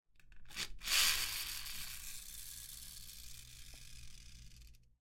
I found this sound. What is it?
31-Arranque motor
sound of a car pulling away